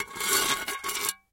Small glass plates being scraped against each other. Very grating wide band noise sound. Close miked with Rode NT-5s in X-Y configuration. Trimmed, DC removed, and normalized to -6 dB.